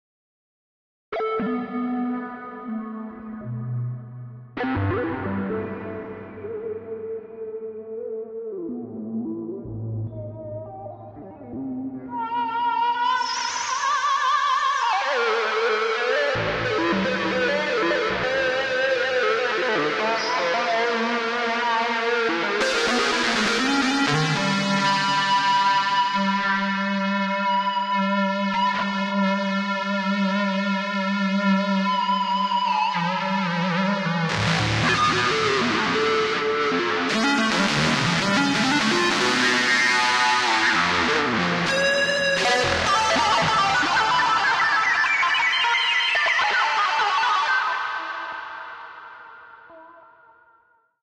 Abstract Guitar
An emulation of an electric guitar synthesized in u-he's modular synthesizer Zebra, recorded live to disk and edited in BIAS Peak.
electric, rock, Zebra, metal, blues, guitar, synthesized, psychedelic